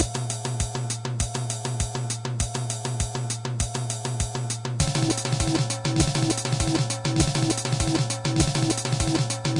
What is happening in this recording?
my first drum loop